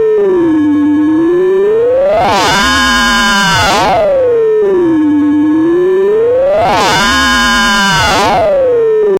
quantum radio snap114
Experimental QM synthesis resulting sound.
experimental, drone, sci-fi, noise, soundeffect